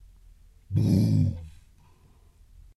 a sound for suspense movies
thrill, creepy, terror, dark, terrifying, suspense, haunted, drama, horror, phantom, ambience, ghost, fear, scary